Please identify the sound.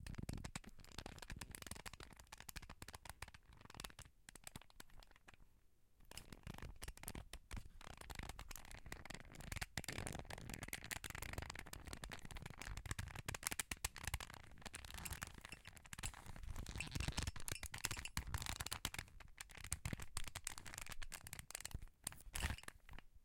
Rubik's cube: squashing and twisting pt.1
This is the recording of a Rubik's cube manipulation.
I recorded myself while squashing and twisting a Rubik's cube.
Typical plastic and metallic sounds.
Cube, manipulation, Rubik, squash, twist